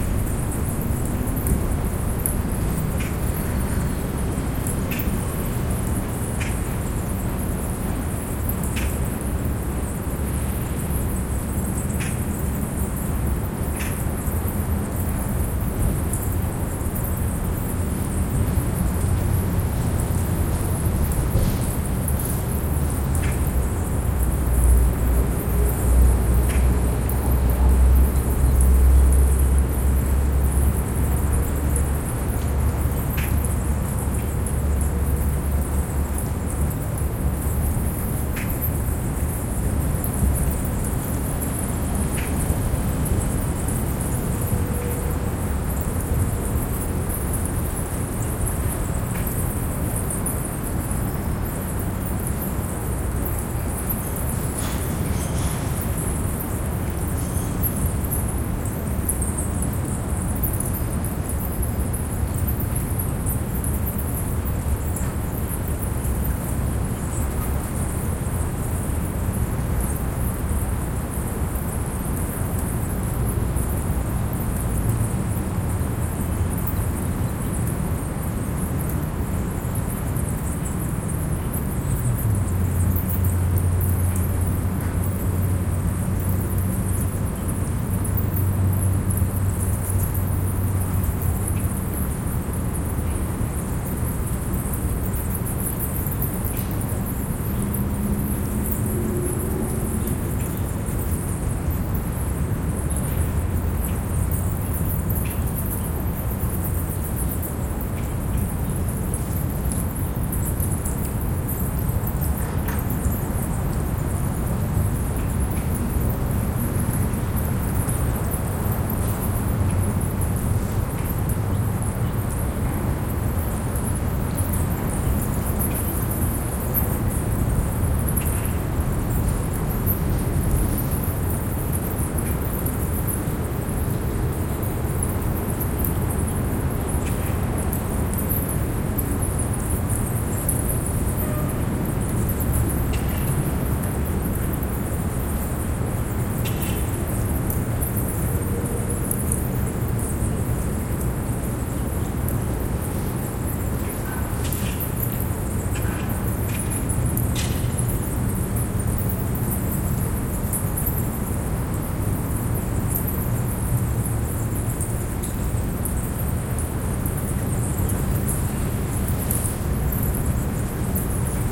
Bats ambience 2
Bats with city ambience, couple bird calls.
From a recording made underneath the 'Congress Bridge' in Austin Texas which is home to a large bat colony.
ambience, bats, birds